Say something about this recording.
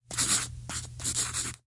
Writing on paper with a sharp pencil, cut up into "one-shots".